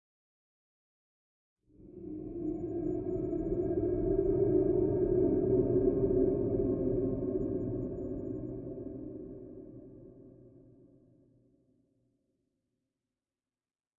Artillery Drone Carrot Orange
Second set of 4 drones created by convoluting an artillery gunshot with some weird impulse responses.
Ambient, Soundscape, Space